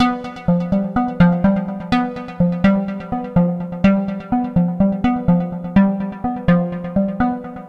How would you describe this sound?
tip toe
another simple arp
arpeggio, light